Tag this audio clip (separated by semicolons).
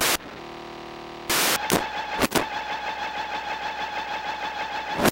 ambeint; circuit-bent; circuits; electro; glitch; noise; slightly-messed-with; static-crush